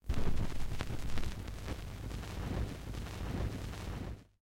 needle-drop-2
The sound of a needle dropping onto a 45 rpm record on a technics 1200 mk2